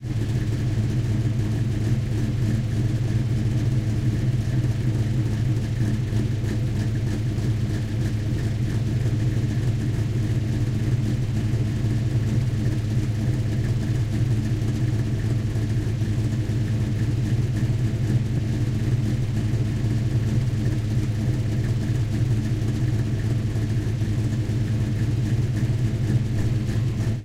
This is the engine rumble sound i recorded in stereo with 2 m-audio pencil condenser mics through Pro Tools. It is the sound of a 1971 or 72 Plymouth Duster that i used for scenes in a music video i recently filmed.
Car, Duster, Muscle, Plymouth, Rumble